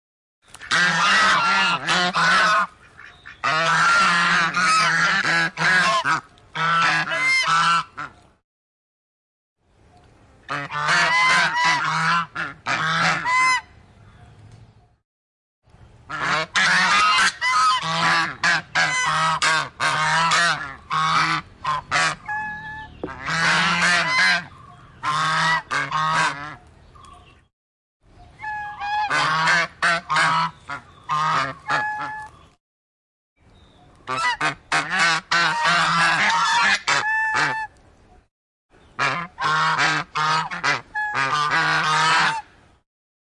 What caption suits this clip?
Geese 6 files stitched together
6 takes of Geese on the farm, with space in between the takes for easy cut and paste.
Birds, Farm, Field-Recording, Geese